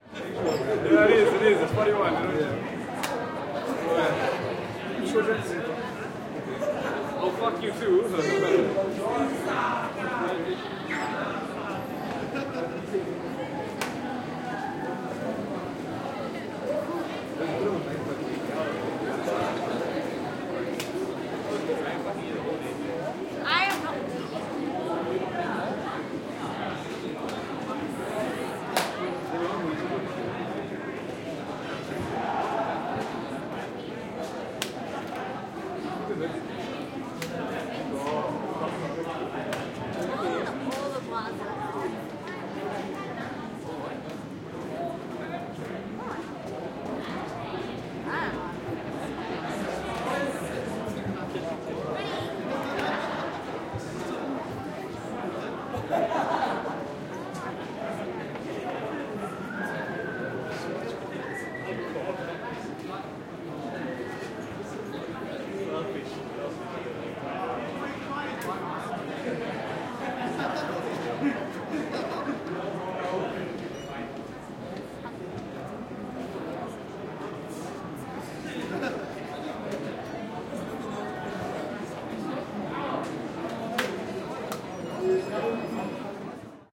Cards at Student Canteen (surround ambience)
Surround ambience recording of students sitting outside the canteen talking and playing cards. Recorded in the Piazza (University of Pretoria student centre) using double MS. Sennheiser MKH40 on rear and front Mid, and Sennheiser MKH30 on shared Side, decoded into a 5.1 mix.